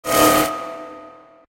stinger robot
robot sound,
sound recorded and processed with vst
automation, machine, interface, bionic, robot, computer, space, android, droid, cyborg, robotic, game, robotics, intelligent, alien, mechanical